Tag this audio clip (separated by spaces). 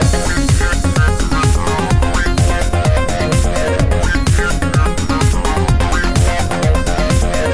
comp full loop